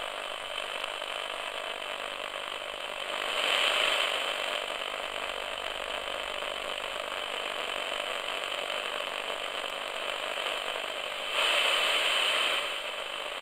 Lo-fi AM/FM radio (Texture)
Lofi radio sound recorded with 3 EUR cheap radio unit.
Recorded with TASCAM DR-22WL.
In case you use any of my sounds, I will be happy to be informed, although it is not necessary.
am, distortion, electronic, field-recording, fm, frequency-sweep, glitch, industrial, interference, lo-fi, lofi, noise, pulsating, radio, shortwave, static, transmission, white-noise